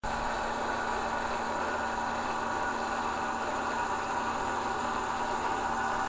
Electric trailer jack sounds like an electric winch, lift or door actuator